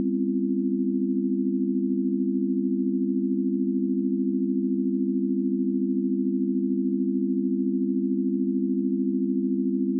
base+0o--4-chord--17--CEFA--100-100-100-80
test signal chord pythagorean ratio